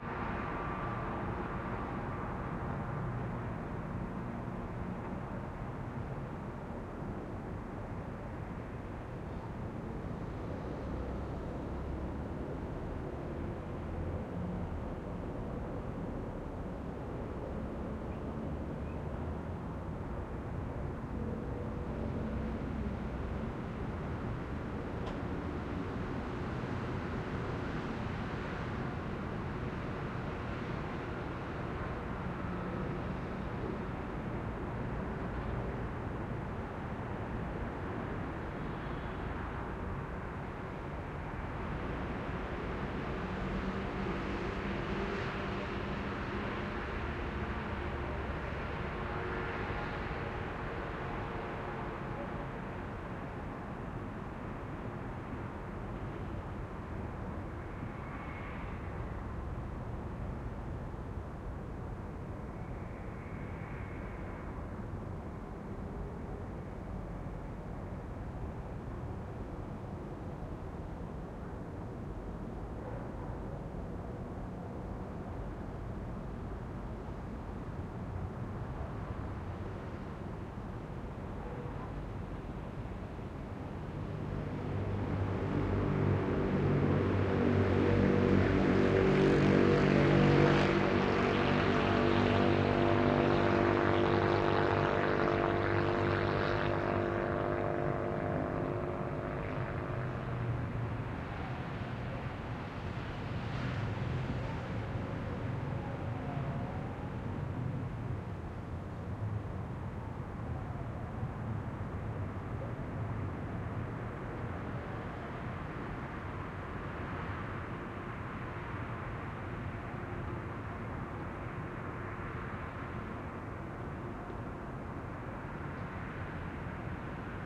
City Terrace Night 2
Night in Athens from a terrace. This is the stereo version of a DMS recording.
night, bus, field-recording, traffic, ambience